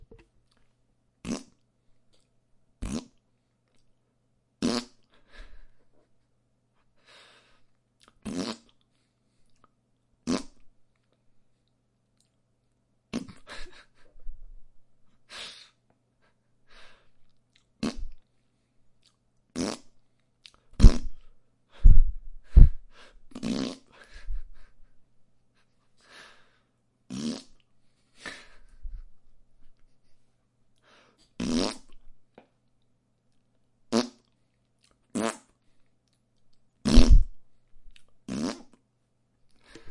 Blowing raspberries. You also get bonus sounds of me laughing
blowing, bottom, fart, Raspberries